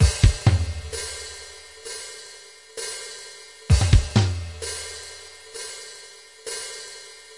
Drum Intro
Made in Mixcraft 8 using Standard Kit 1.
loop, break, intro, drum-loop, drums